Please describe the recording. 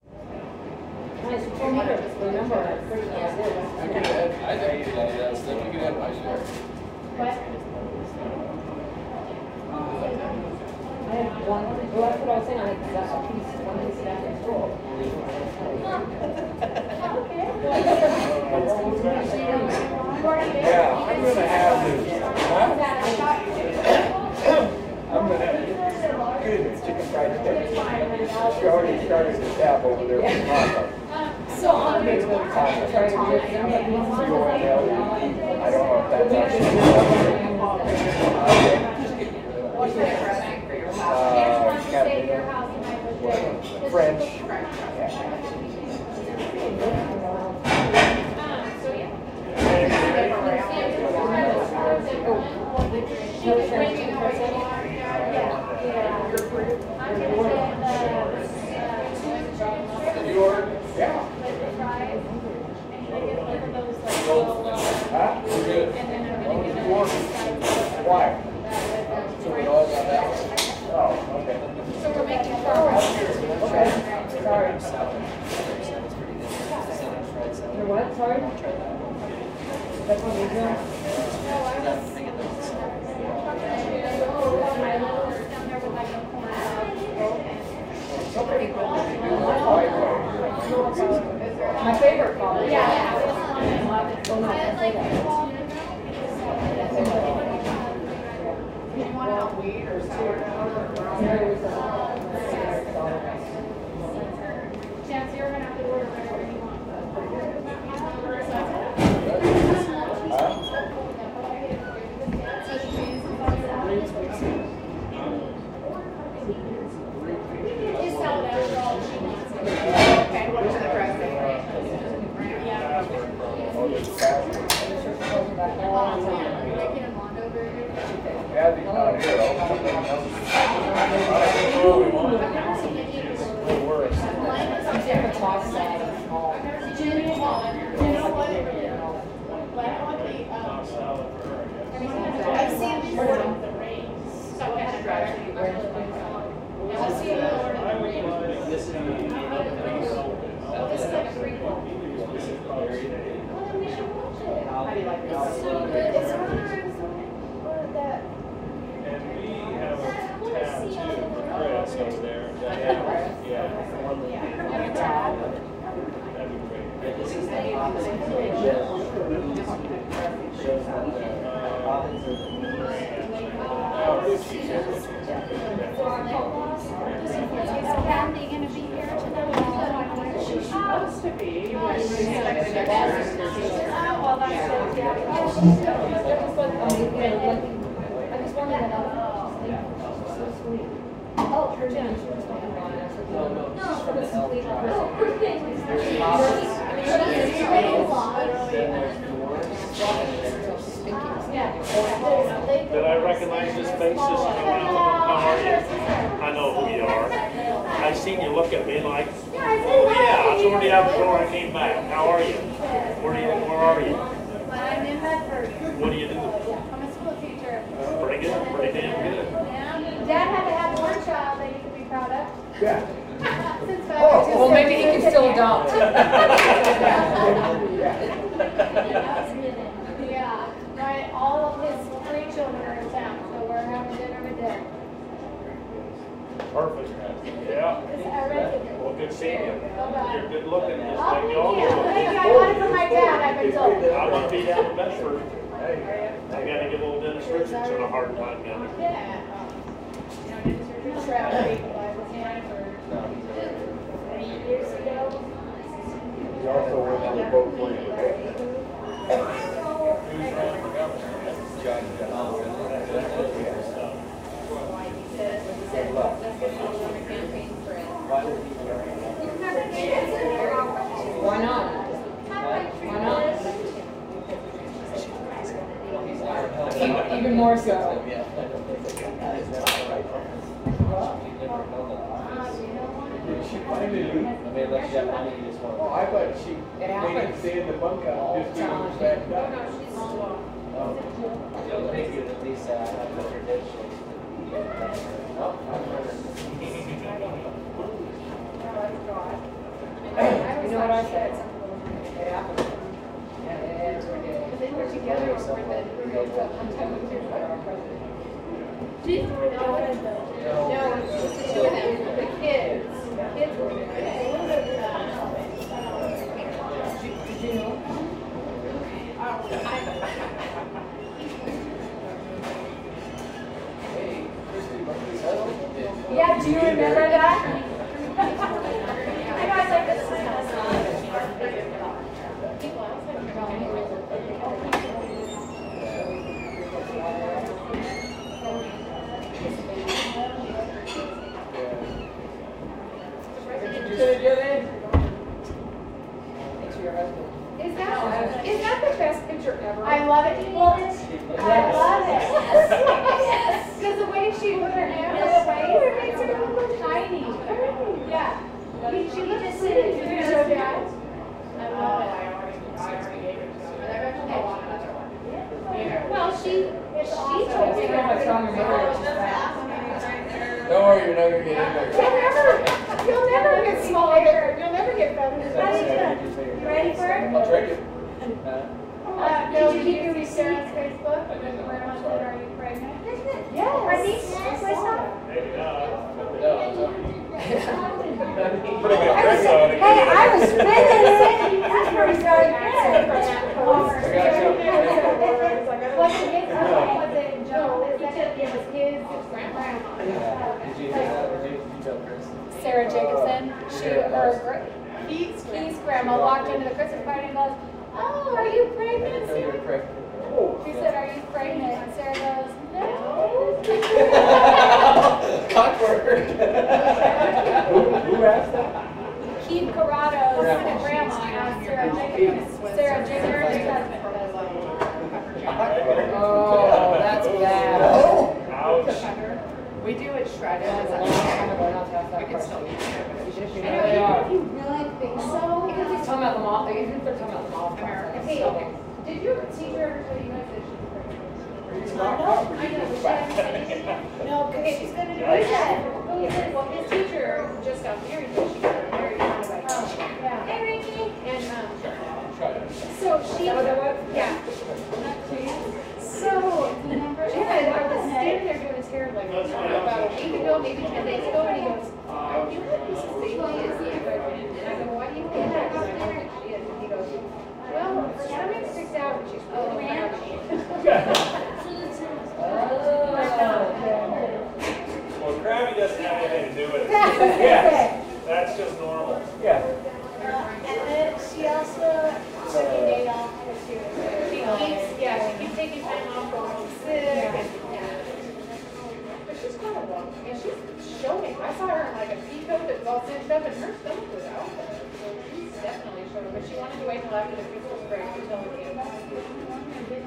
Grill Restaurant 001
This is a small restaurant in a country town. Lots of walla and chatter.
Recorded with: Sound Devices 702T, Sanken CS-1e
ambience, bar, chatter, crowd, deli, grill, people, restaurant, talking, tavern, walla